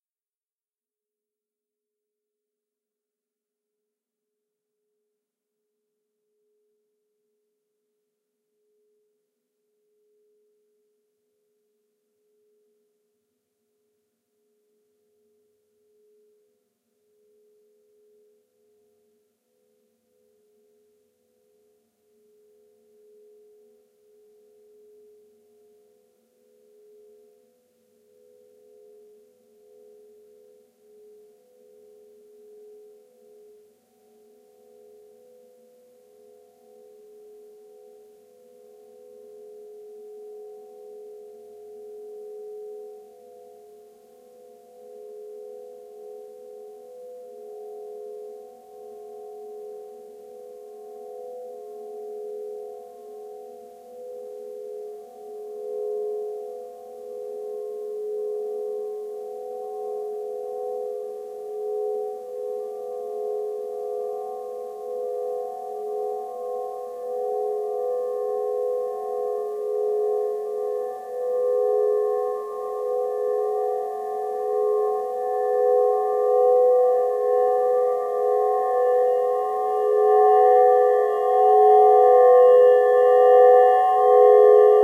Glass Build Up
A reversed version of Singing-bowl Esque
Nice tension builder ;-)
appear, approach, backwards, build, build-up, cinematic, crescendo, fear, glass, intro, reverse, rise, rising, tension